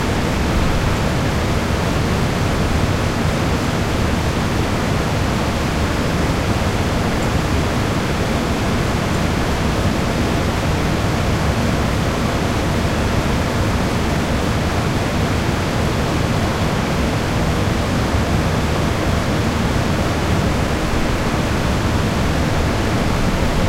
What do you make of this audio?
fan helsinki socispihamust2
One in a collection of fans, all in the same back yard. Among my other fan sounds you can find other individually pointed recordings of this group of fans. Field recording from Helsinki, Finland.
Check the Geotag!
ambience, city, fan, listen-to-helsinki, noise